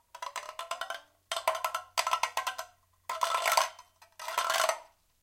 short sample of playing guirro
ethno, short, guirro